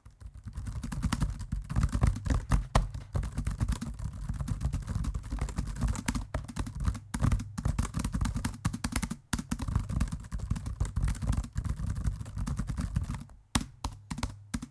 Typing Laptop Keyboard 4
Recording, Zoom, Stereo, Typing, Macbook, H1, Computer, Keyboard, Laptop